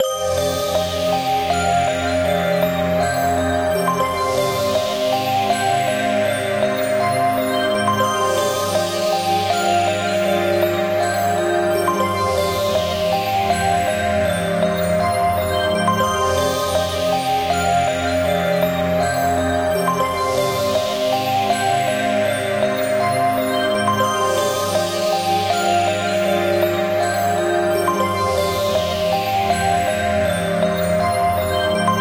Ethereal Orchestra used in Kontakt.
My Original Track: